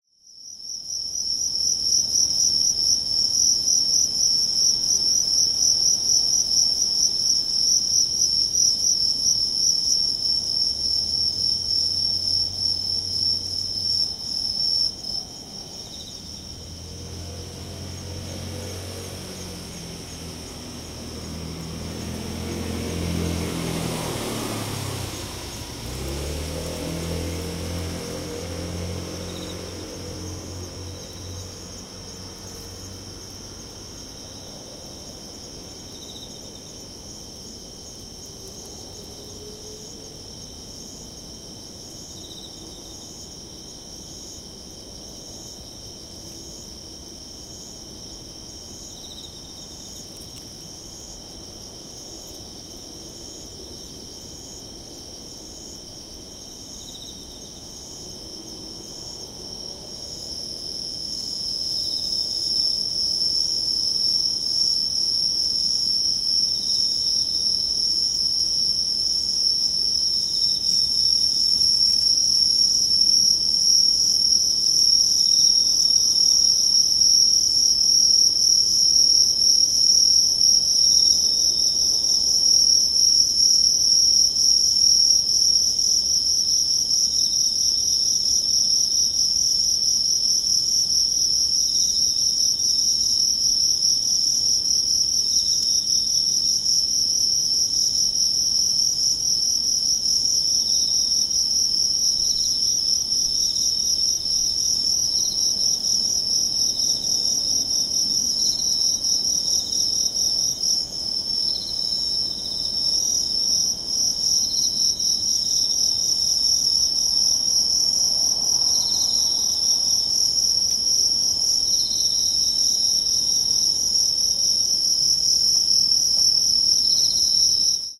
Recorded early September 2016, midnight, Kashiwa, Japan. Equipment: Zoom H2N on MS stereo mode.
ambience, ambient, bugs, cicadas, crickets, field-recording, japan, late-summer, nature, night, quiet, town, traffic, village, walk